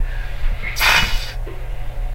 sticking a burning incense stick into the bathtub